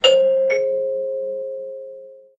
I've edited my doorbell recording in Audacity to (sort of) tune it to an octave from C to B, complete with sharp notes.
ding, bong, house, ping, door-bell, ding-dong, bell, door, doorbell, tuned, c, csharp